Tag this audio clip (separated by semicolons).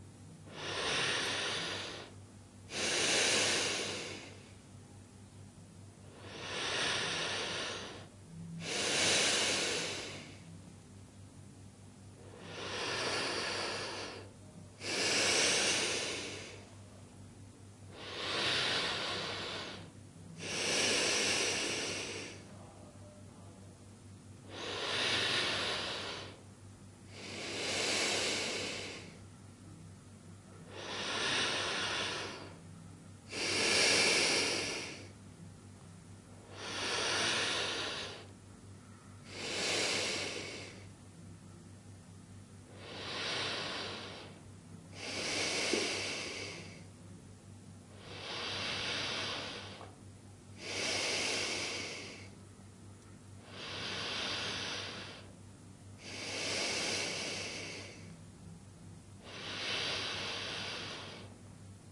inspiration; breathing